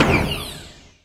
Gun Thumper
Perfect for bringing the ultimate immersion into glorious space adventures!
A collection of space weapon sounds initially created for a game which was never completed. Maybe someone here can get more use out of them.